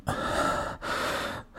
Breath Scared 02
A male agitated scared single breathing sound to be used in horror games. Useful for extreme fear, or for simply being out of breath.
terrifying, rpg, gamedeveloping, breathing